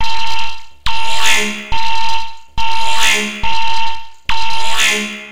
This is my second alarm recording produced with LMMS (Ami Evan) then imported in Audacity where I recorded my own voice and added a vocoder to make it sound more robotic.